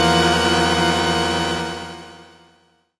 PPG 011 Dissonant Organ Chord C3
This sample is part of the "PPG
MULTISAMPLE 011 Dissonant Organ Chord" sample pack. It is a dissonant
chord with both low and high frequency pitches suitable for
experimental music. In the sample pack there are 16 samples evenly
spread across 5 octaves (C1 till C6). The note in the sample name (C, E
or G#) does not indicate the pitch of the sound but the key on my
keyboard. The sound was created on the PPG VSTi. After that normalising and fades where applied within Cubase SX.
chord, dissonant, multisample, ppg